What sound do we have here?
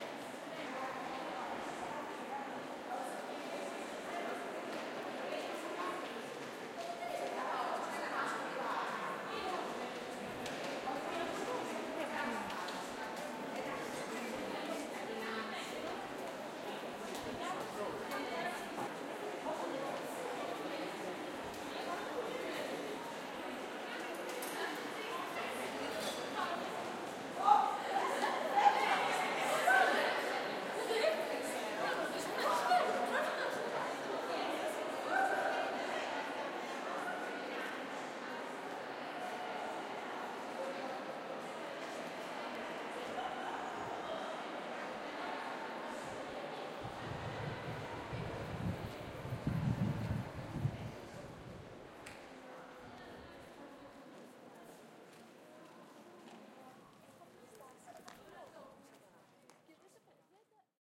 Huge university indoor hallway ambience
Field recording of a Greek university's hallway using XY90 stereo techique from Zoom Hg
90, XY, ambience, atmo, atmoshpere, big, crowd, field, h6, hall, hallway, huge, people, recording, school, students, talking, tone, university, voices, wide, zoom